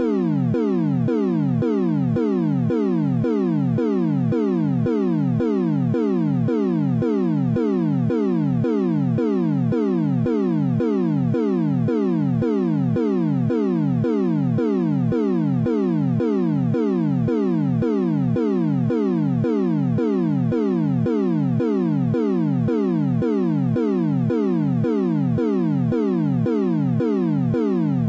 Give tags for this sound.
abstract arcade dark eeire effect fx Galaga Galaxian games sci-fi sound-design space starfield strange synth synthesis video-games weird

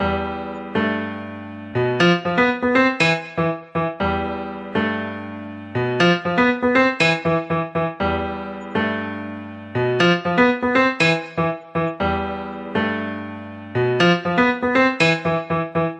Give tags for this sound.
piano loop

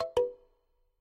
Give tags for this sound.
app chime click notification Ring

